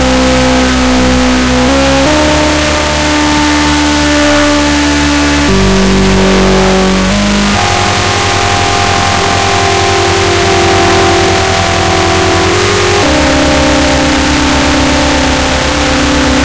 A Sick Piano
romance, ambient, broken, hard, scrape, piano, summer, scape, processed, rythm